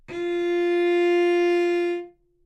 Part of the Good-sounds dataset of monophonic instrumental sounds.
instrument::cello
note::F
octave::4
midi note::53
good-sounds-id::4294